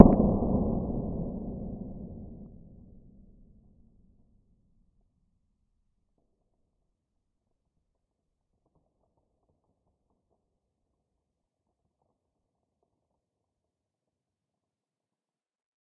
a systematic series: I´ve recorded the pop of a special packaging material several times with different mic settings. Then I decreased the speed of the recordings to 1/2, 1/4, 1/8 and 1/16 reaching astonishing blasting effects. An additional surprising result was the sound of the crumpling of the material which sound like a collapsing brickwall in the slower modes and the natural reverb changes from small room to big hall